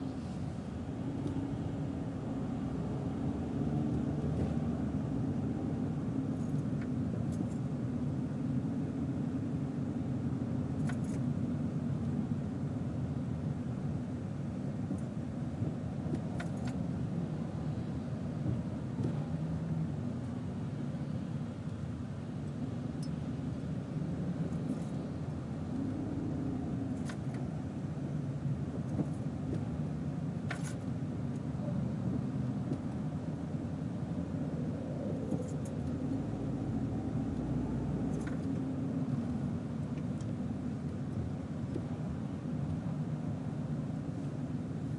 Audio of a car interior taken as it was driven on a freeway during rush hour.
Recorded with iPhone 4S bottom microphone.